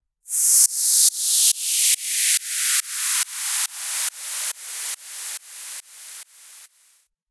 Simple Noise Sweep 140BPM
Simple noise sweep made with 3xOsc (140BPM)
sidechain, Noise, Sweep, Trance, Simple, 140BPM, 3xOsc